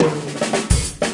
Just a drum fill.
Recorded using a SONY condenser mic and an iRiver H340.